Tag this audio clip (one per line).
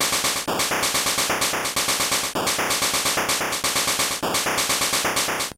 8bit
videogame
glitch
gameboy
cheap
drumloops
chiptunes
nanoloop